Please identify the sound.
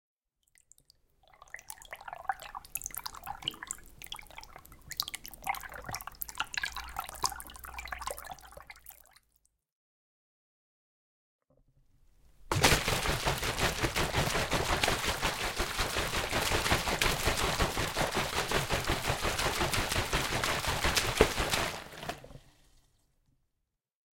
09 Swirling Water
water being swirled by hand - 2 variations
Panska, Water, Bubbles, CZ, Czech, Splash